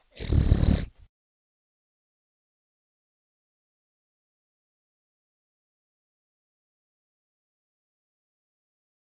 Throat Noise
I recorded a noise I can create with my throat.
awful, dinosaur, dynamic, growl, mic, noise, throaty, wierd, zombie